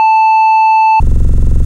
electronically generated tones, using an audio editing program, left clean- no effects of any kind applied, other than some filtering